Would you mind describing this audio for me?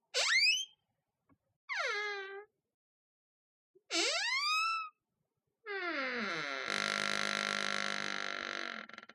Door squeak
Squeaky door opening and closing twice, fast and a bit slower.
close, creak, door, hinge, metal, open, squeak, squeaky